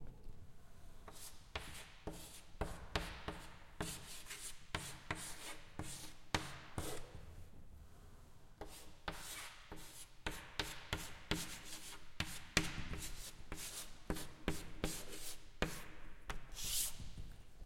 12 Chalk writing
Chalk writing in school
zoom, school, chalk, writing